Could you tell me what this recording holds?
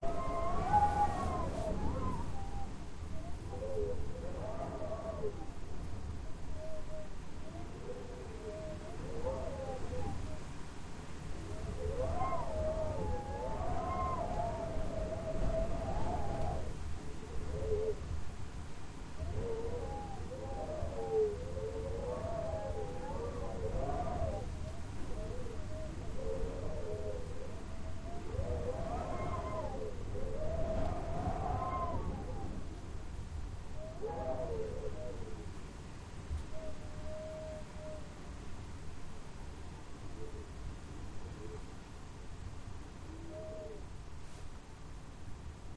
Hurricane Bertha by the time it reached eastern England, recorded at my house, Tascam DR-07 II.